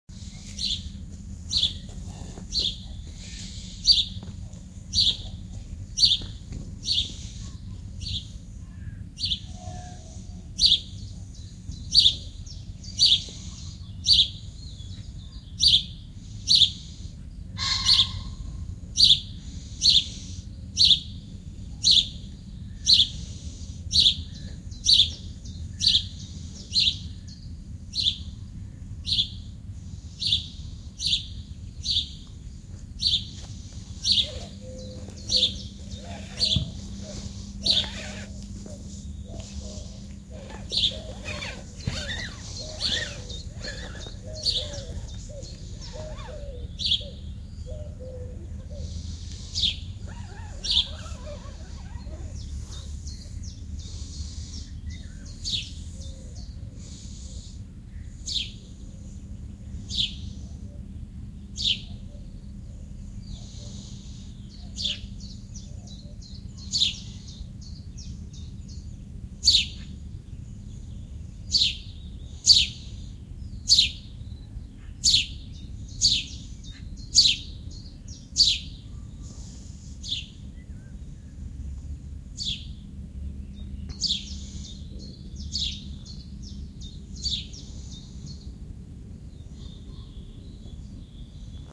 One loud bird in forest
birds forest spring bird nature field-recording birdsong
Nature, Forest